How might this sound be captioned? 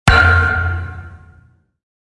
VSH-32-hand-slap-metal pipe-short
Metal foley performed with hands. Part of my ‘various hits’ pack - foley on concrete, metal pipes, and plastic surfaced objects in a 10 story stairwell. Recorded on iPhone. Added fades, EQ’s and compression for easy integration.
crack, fist, hand, hit, hits, human, kick, knuckle, metal, metallic, metal-pipe, metalpipe, percussion, pop, ring, ringing, slam, slap, smack, thump